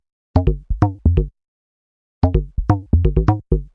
SP modular perc C 128 7
electronic, loop, percussion